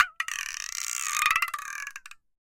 Indonesian instrument spinning erratically. It was recorded in a studio with a matched pair of Newman KM 184 set up in an A-B pattern and in a reflection filter.
loud, erratic, up, instrument, close, stereo, indonesia, movement, spinning, soft